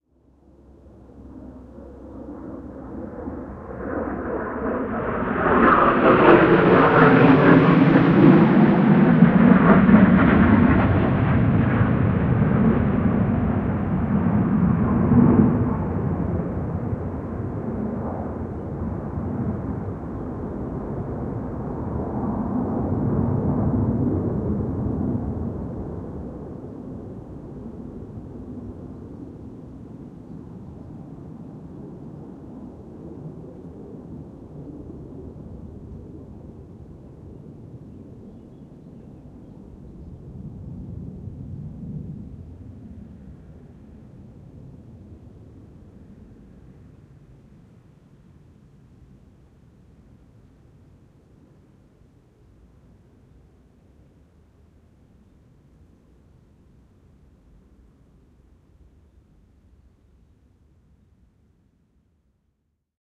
Fighter Jets overflight - QUADRO
Overflight of 2 fighter jets (Eurofighter). In Quadro (in 5.1-terms: L-R-SL-SR) you will hear them coming from front-left flying "above the head" to rear-right(roughly spoken).
The 4 channels are recorded in IRT-cross technique (Microphone distance 25cm) so it is a 360° record.
Used recording gear:
ZOOM F8
4 x Rode NT1 (without "A" = the black ones)
CH1 = FL
CH2 = FR
CH3 = RL
CH4 = RR
The Download-file is a PolyWAV.
If you need to split the file (e.g. to make a stereo file), you can use the easy to use
from Sound Devices for example.
Comments about this atmo-record are welcome!
jet, Warbird, fly-by, Surround, Quadro, Atmo, Warplane, fieldrecording, military, Fighter-jet, Overflight, 4-channel, aircraft, plane